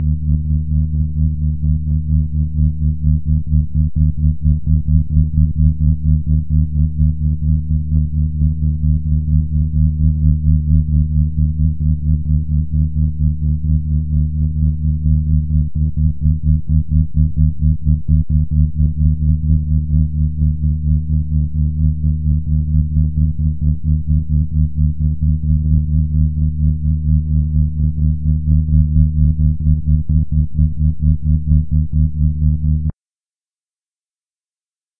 This sound file is a piece of a larger file made by taking a years worth of tidal data from Sandy Hook, NJ, USA, adding interpolated points between the 6-minute tidal data, and then importing the data into Audacity as a raw file. The sample frequency and/or number of interpolated data points changed the frequency of the sound, but the pulsating nature was the constant; produced by the neap and spring tidal cycles (~750 tides in a year).
neap, earth, moon, tides, hook, data, sandy, spring